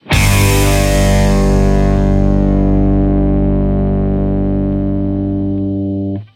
Guitar power chord + bass + kick + cymbal hit